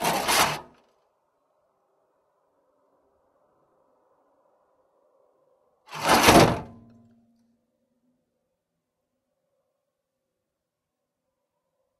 Chimney - Open and close
A smithy's chimney is opened and closed for ventilation.